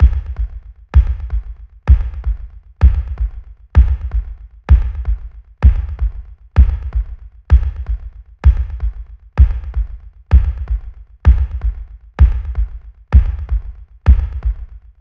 Experimental Kick Loops (8)
A collection of low end bass kick loops perfect for techno,experimental and rhythmic electronic music. Loop audio files.
120BPM, 2BARS, 4, BARS, bass, beat, dance, design, drum, drum-loop, end, groove, groovy, kick, loop, Low, percs, percussion-loop, rhythm, rhythmic, sound, Techno